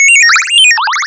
A whistle that quickly changes pitch; to the human ear it can be similar to a bird chirp, but birds are not likely to be fooled. Totally synthetic, created in Cool Edit Pro. This one is double length, with an initial sound repeated, but with different second-or-third generation pitch shifting applied for a more interesting twist. Could be a power-up sound, a level-up sound, or whatever, in a video game. Still somewhat birdlike but with a more bell-like feel than some of the others, plus burbles.